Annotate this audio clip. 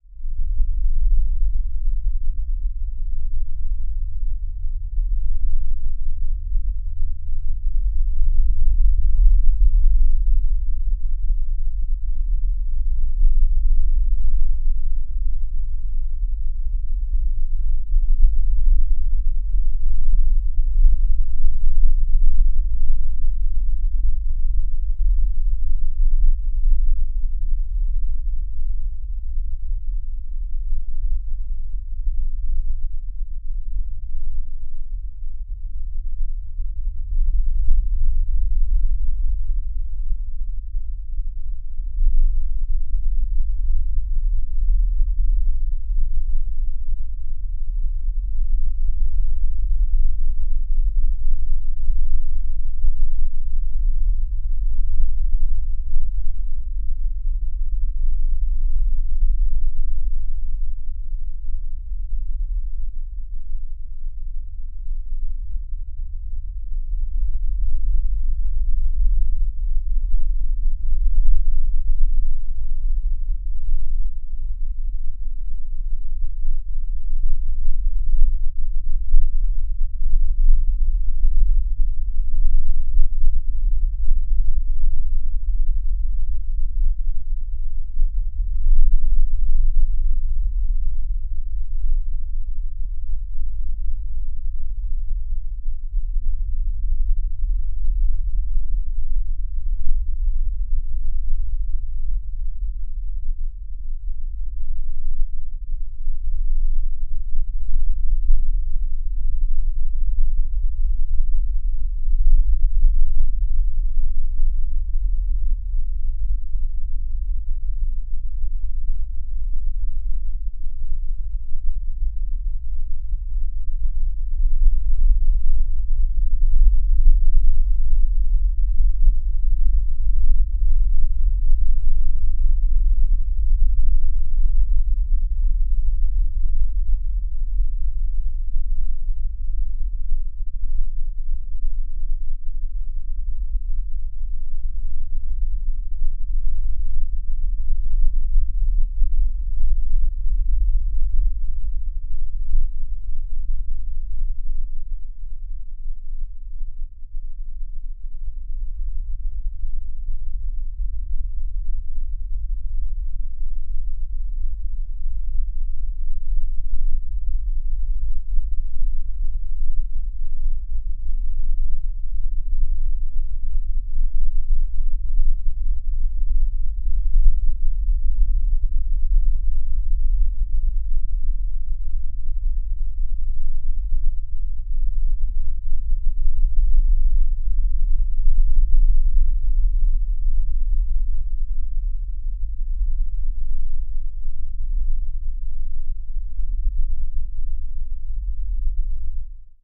recording of highway vibrations through the earth. Microphone was
placed on a resonating panel under a highway overpass, the element was
covered with heavy damping material. Processed with lowpass filters via
cambridge eq. Recorded with a Rode Nt-4 microphone, Sound Devices MixPre preamp into a Sony Hi-Md recorder.